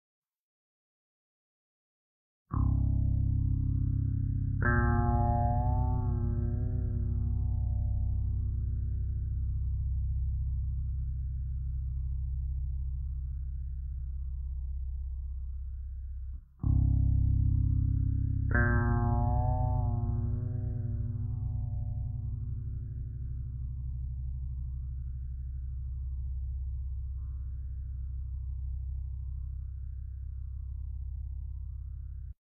bend test slow down...by adobe premier CS5
down, guitar, slow, test